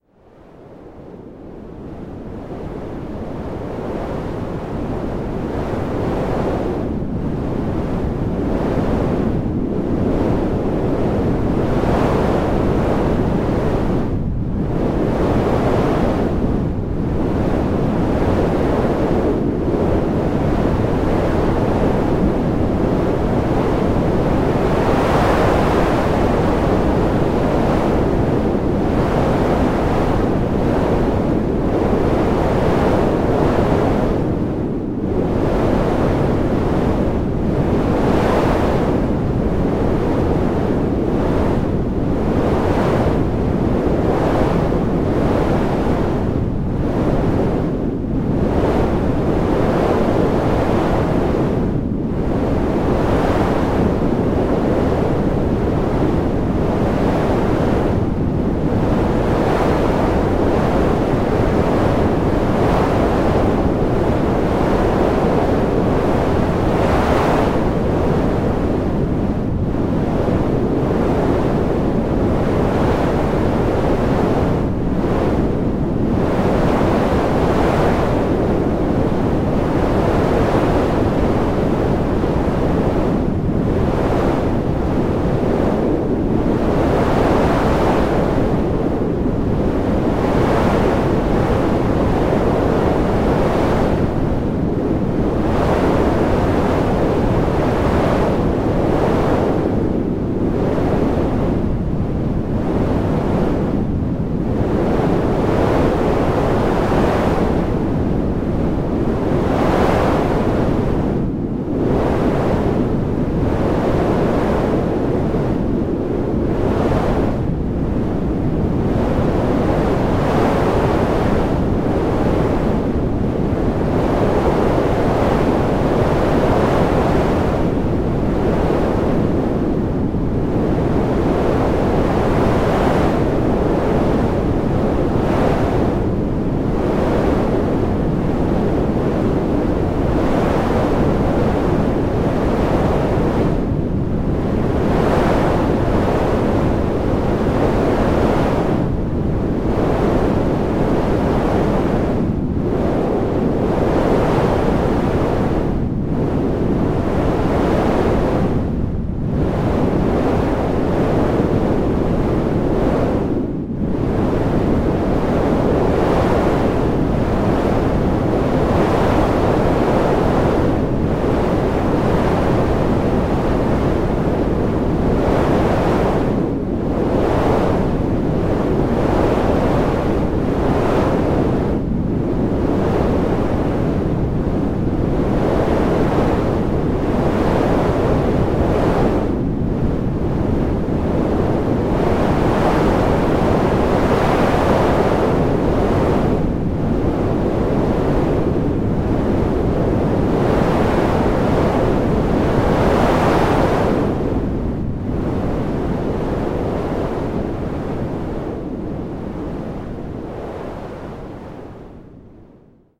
This is some wind.I processed this using the latest version of audacity at the time of uploading.This might remind you of a minor hurricane, and is what it has been intended to be used for.
massive, processed, storm